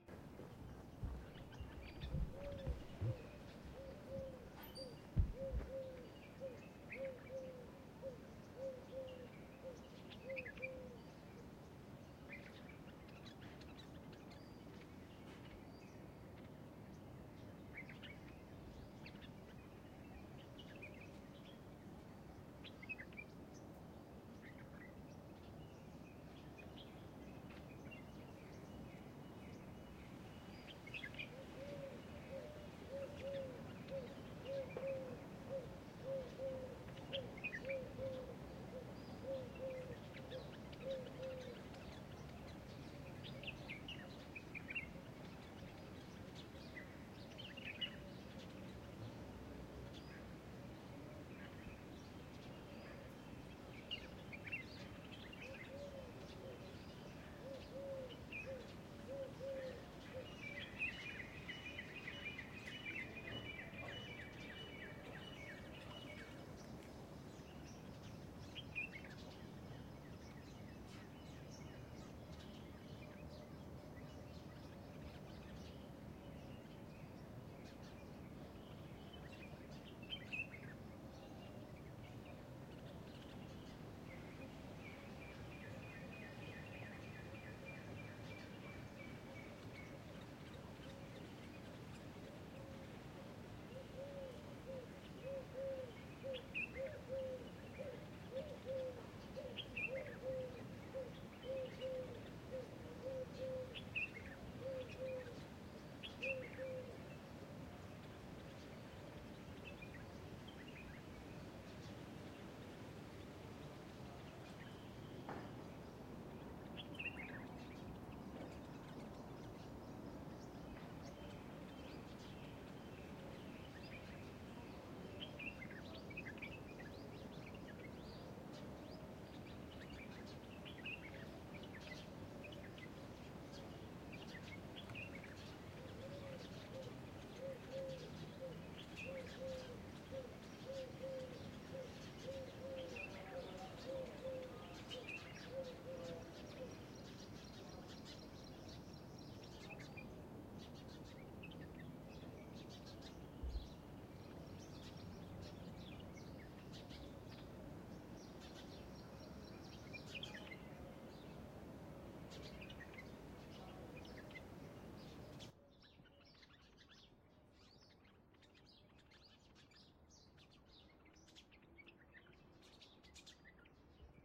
Oases SN
Atmo in Al Ain oases. very quiet atmosphre with birds and pigeon, UAE
pigeons, ambient, Al, morning, quiet, birds, Ain, Atmo, field-recording, atmosphre, UAE, oases